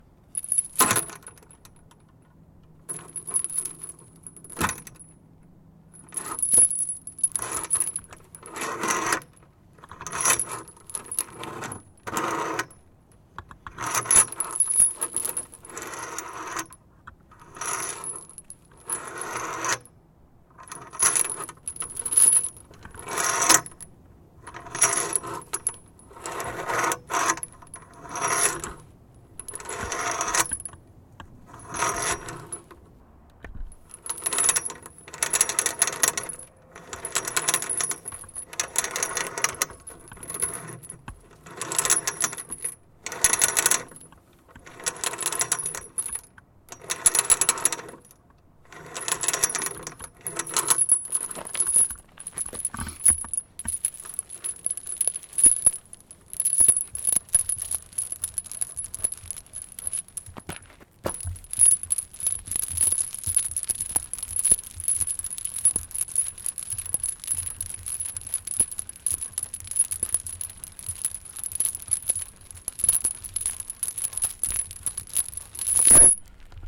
Scraping, jiggling and shaking a looped chain attached to the metal leg on a picnic table.
chain-link, chains, metal-chains, scrape